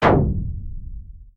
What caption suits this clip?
Sci-Fi Force Field Impact 15
A futuristic sound effect.
armor, charging, digital, electronic, future, generator, hit, impact, lab, laser, loading, off, propulsion, pulse, shield, space, tech, technology, warp